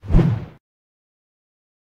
A low swooshing sound,
I am using it for my game, Pokemon Meteor